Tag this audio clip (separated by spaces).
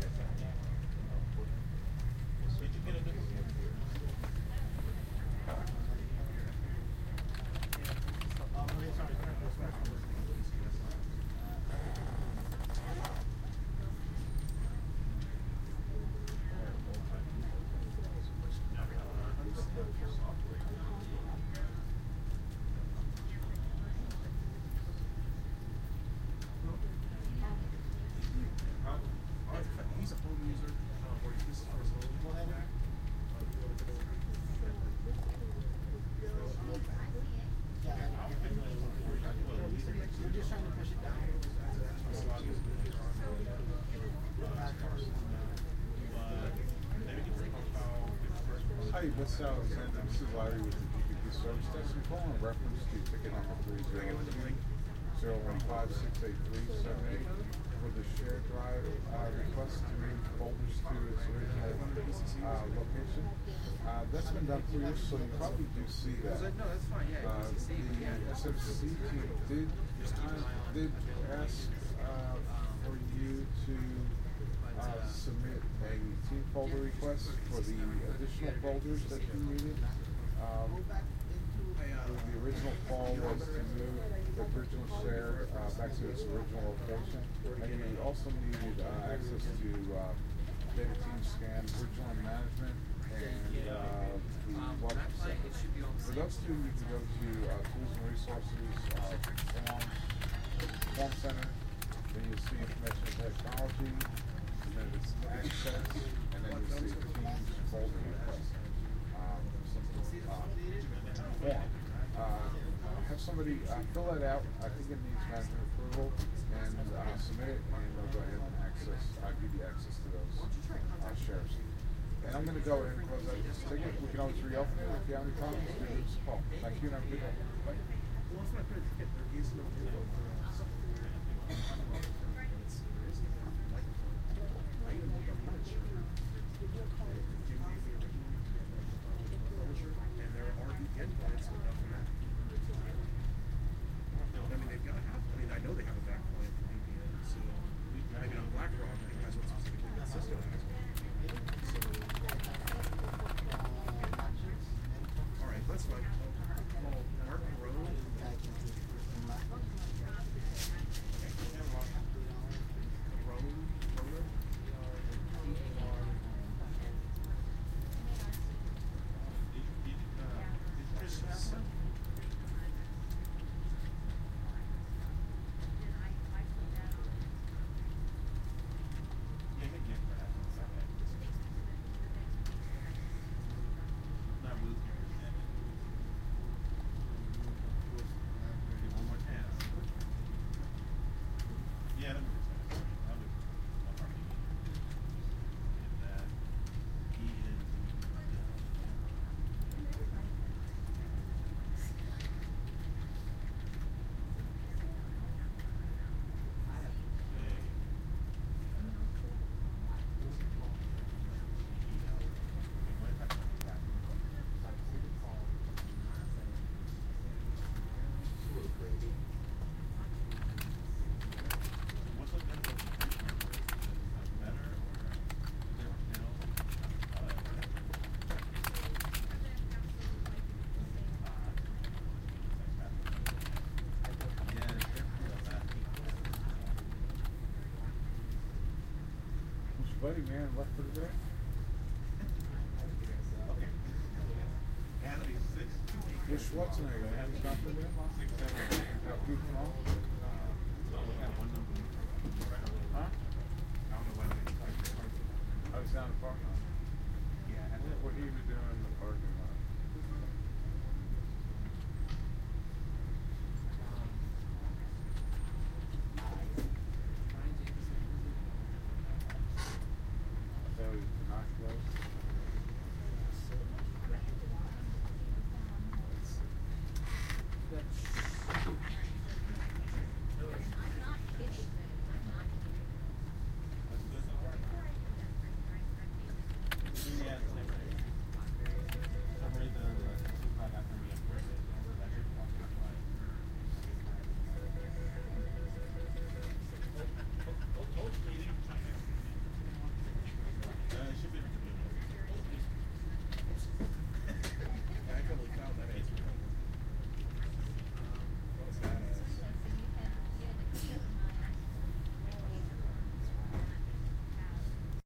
charis office austin internet typing telepones laughing